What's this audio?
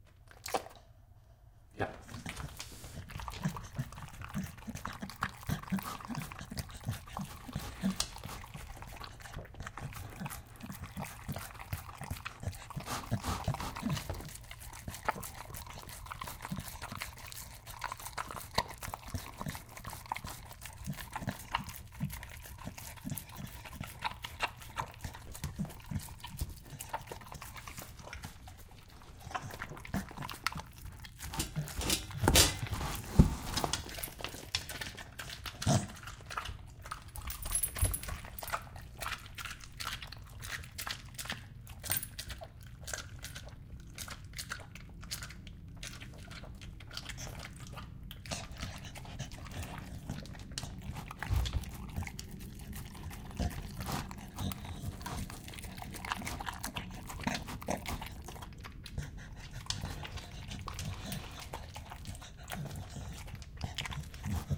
Dog Eating from Bowl
Staffordshire Bull Terrier eating minced meat from metal bowl.
Recorded with Sound Devices 722 and Sennheiser MKH20/30 in MS.
Converted to XY.